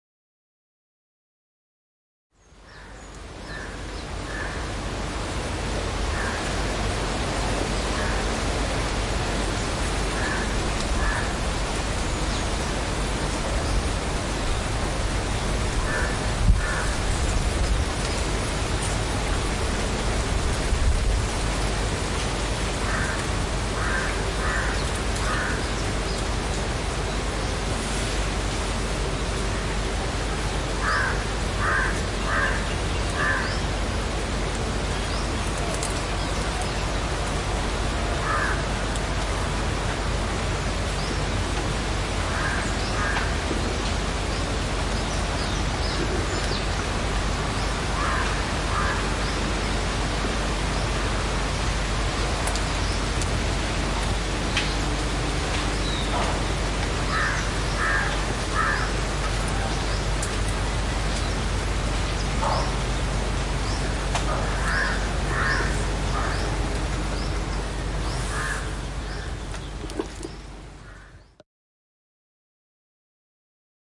Gentle Breeze
All the best.
Dharmendra Chakrasali
Farm, Atmosphere, Field-Recording